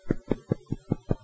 clapping hands with gloves on. I dunno, maybe these would make good soft impact sounds? *shrug*